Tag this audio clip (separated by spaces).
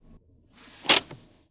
car Czech Panska CZ handbrake